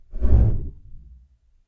Wing Flap Heavy (prototype)
A wing flap! A heavy~wing~flap (attempt)!
Recorded using:
Paper and flap it near the mic
Editor:
Audacity
dragon-wing, flying-beast, flying, wind, flying-dragon, heavy-wing, gust